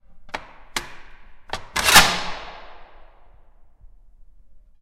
Prison Locks and Doors 24 Viewing shutter turn and slide
From a set of sounds I recorded at the abandoned derelict Shoreditch Police Station in London.
Recorded with a Zoom H1
Recorded in Summer 2011 by Robert Thomas
London, Police, Prison, Shoreditch, Station, doors, latch, lock, locks, scrape, squeal